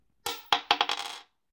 short, coin dropped on table.